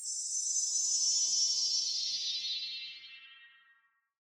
Audio fx of a teleporter made in Audacity.
spaceship, teleport, futuristic, future, sciencefiction, star, fiction, technology, science, trek